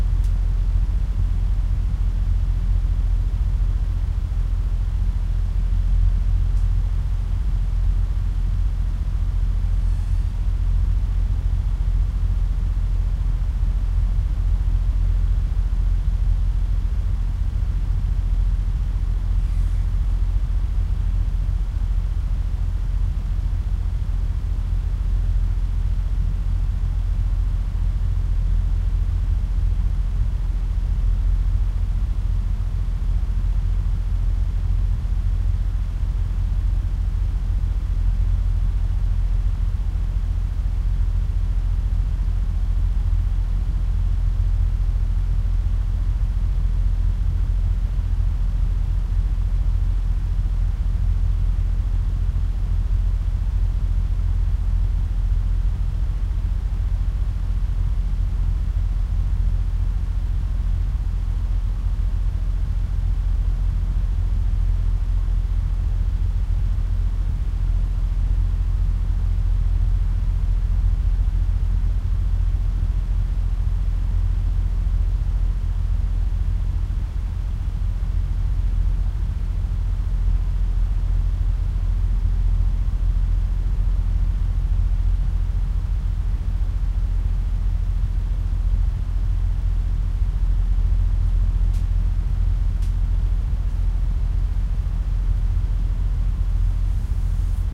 Ventilator vent I dont know how to describe this better ?